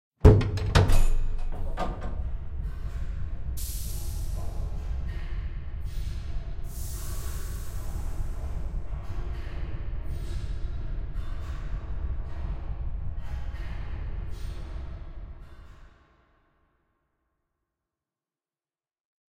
Factory environment mix
For a podcast intro, I was asked to design an audio environment sounding like a factory. It's fairly simple, and is only meant to begin painting a mental picture for a few seconds before it gets overpowered by other effects, VO, and the intro music.
Sources:
bunker, environment, factory, industrial, machine, machinery, mechanical, plant